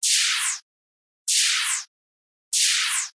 knife sound
game, knife, rubbed, sound